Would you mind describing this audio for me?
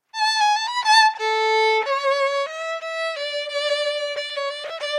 The parameters used for the analysis were:
- window: blackman
- window size: 883
- FFT size: 1024
- magnitude threshold: -70
- minimum duration of sinusoidal tracks: 0.1
- maximum number of harmonics: 100
- minimum fundamental frequency: 300
- maximum fundamental frequency: 1000
- maximum error in f0 detection algorithm: 7
- max frequency deviation in harmonic tracks: 0.01
- stochastic approximation factor: 0.1
violin, synthesized, harmonic